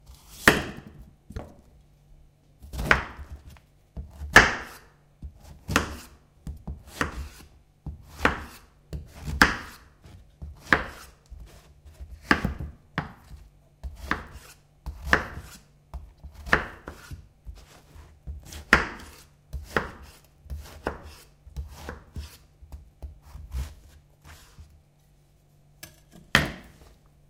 Cutting Zucchini
Just a big zucchini on a wooden cutting board getting cut by a big knife. Zoom H2 recording.